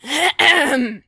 clear throat16
real clearing of the throat
clear, throat, voice